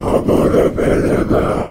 A sinister low pitched voice sound effect useful for large creatures, such as demons, to make your game a more immersive experience. The sound is great for making an otherworldly evil feeling, while a character is casting a spell, or explaning stuff.
monster, sfx, gaming, Speak, videogames, arcade, gamedeveloping, Demon, low-pitch, game, deep, videogame, vocal, gamedev, indiegamedev, games, male, brute, RPG, voice, troll, indiedev, Talk, fantasy, Devil, Voices